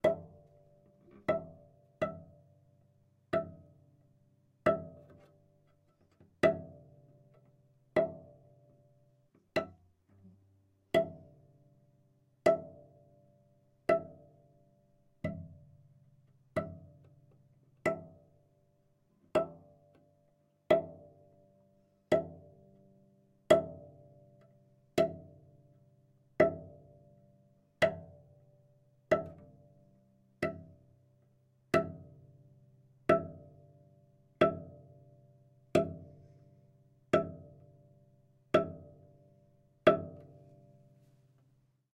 Concrete Cello 08 Pizz
The "Concrete Cello" pack is a collection of scraping, scratchy and droning improvisations on the cello focussing on the creation of sounds to be used as base materials for future compositions.
They were originally recorded in 2019 to be used in as sound design elements for the documentary "Hotel Regina" by director Matthias Berger for which I composed the music. Part of the impetus of this sampling session was to create cello sounds that would be remiscent of construction machines.
You can listen to the score here :
These are the close-micced mono raw studio recordings.
Neumann U87 into a WA273 and a RME Ufx
Recorded by Barbara Samla at Studio Aktis in France